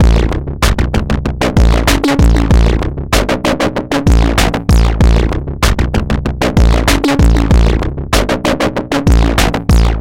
pbriddim mgreel
Formatted for the Make Noise Morphagene.
This reel consists of a spliced drum beat. The final splice is the whole loop without any splices.
Modular drums through Quad Plague Bearer.
morphagene, drum-loop, mgreel